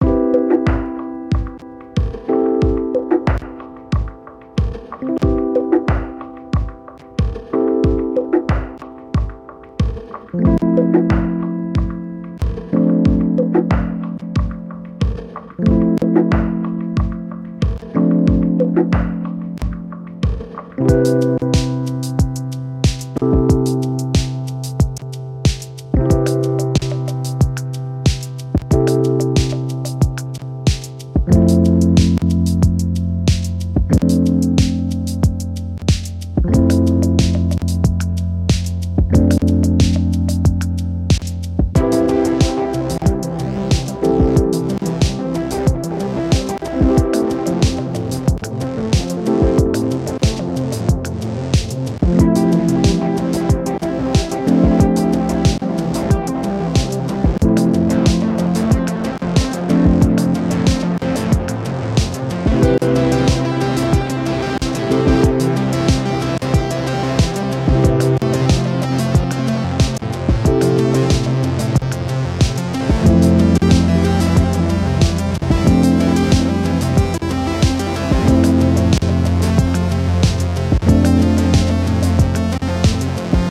Live Recording Using:
Arturia Minibrute
Arturia Drumbrute
Korg M3
Novation Circuit
analog, arturia, beat, dance, digital, drum, drum-loop, drums, electro, electronic, experimental, funky, groove, happy, hardware, house, improvised, korg, light, live, lofi, loop, music, noise, novation, percussive, quantized